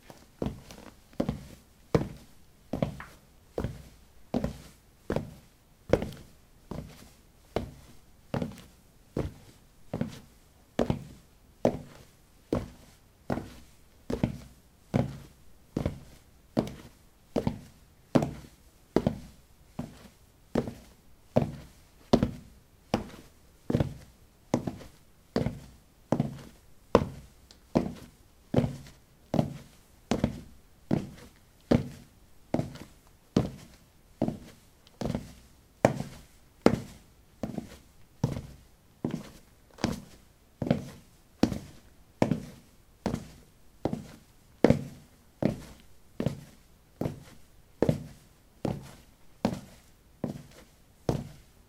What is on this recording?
ceramic 14a lightshoes walk
Walking on ceramic tiles: light shoes. Recorded with a ZOOM H2 in a bathroom of a house, normalized with Audacity.
footstep, footsteps, steps